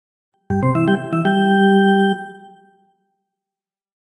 Play Ball!
The classic old time "play ball" tune, synthesized in Noteworthy Composer.
ball, baseball, hammond, music, organ, play, synthesized